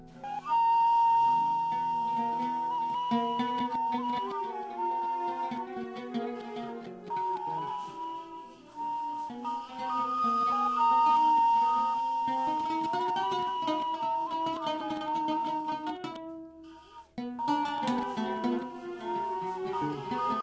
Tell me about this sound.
oud and ney sound
ney, trke, loop, arab, sound, song, oud, sample, oriental